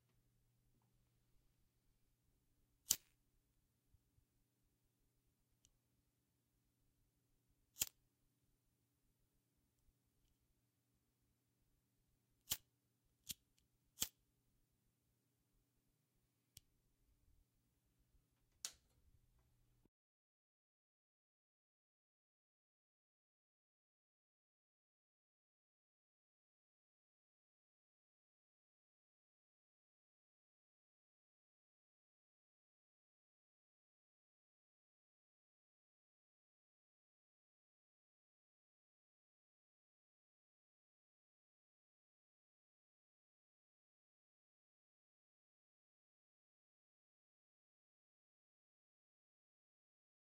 untitled lighter 1
field-recording, lighter